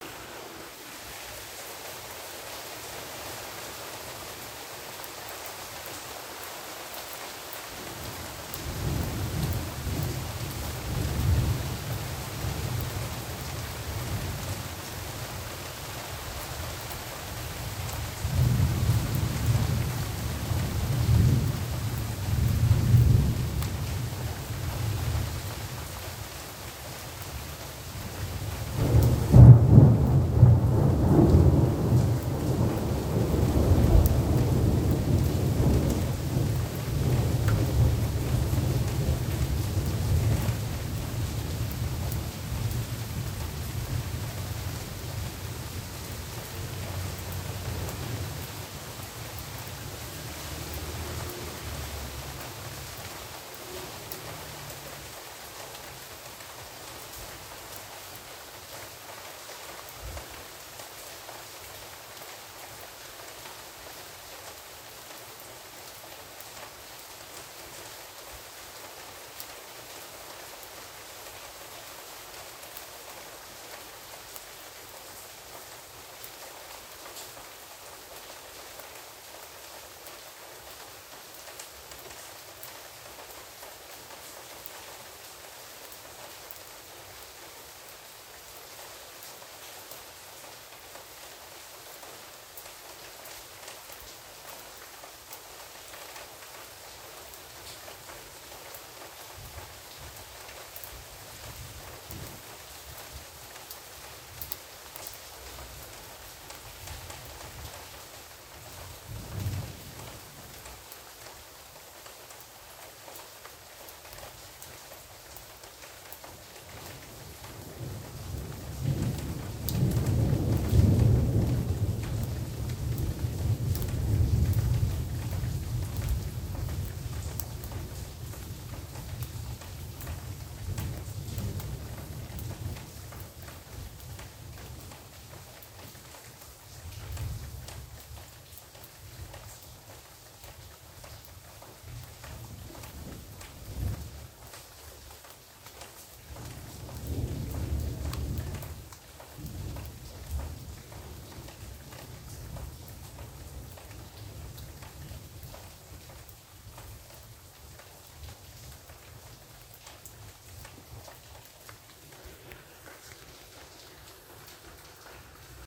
Thunderstorm with Heavy Rain 2
A raw recording of thunderstorm that my father recorded, Istanbul, Turkey. Heavy thunderstorm interrupting sleep at 3 am (but it didn't wake me up)